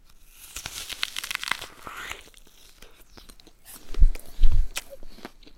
Apple Biting and Chewing
A very slow, grinding bite into an apple followed by a little bit of chewing and nice slurping. Recorded in a hifi sound studio at Stanford U with a Sony PCM D-50 very close to the source, a yellow/green golden delicious.
aip09, apple, bite, biting, chew, chewing, crunch, delicious, golden, hifi, slow, slurps